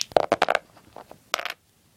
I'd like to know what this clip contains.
wood impact 16
A series of sounds made by dropping small pieces of wood.
impact, crash, drop, wooden, wood, hit, block